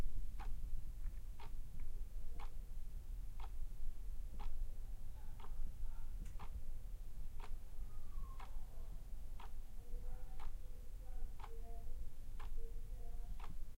Clock Ticking
A Zoom H6 recorder was used, with the XY Capsule to get a better range of the clock when held very close to it. The clock is made out of wood with two steel arms and a plastic seconds arm.
Ticking-Clock, OWI, Ticking, Clock, Time